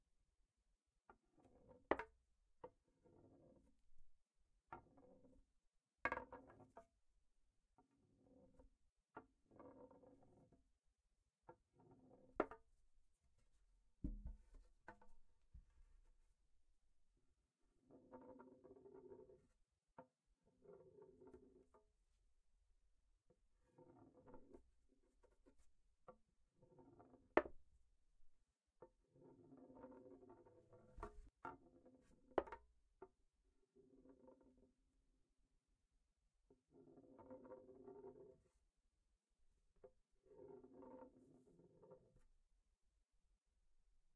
wood ball rolling on 2x4 friction
Wooden balls rolling on a 2x4 plank.